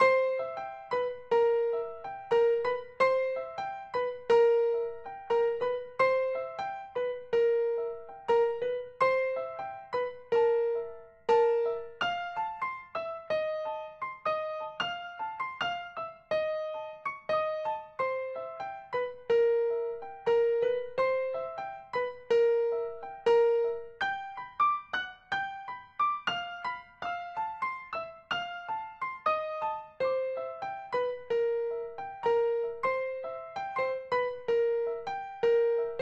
Song4 PIANO Do 4:4 80bpms
80, beat, blues, bpm, Chord, Do, HearHear, loop, Piano, rythm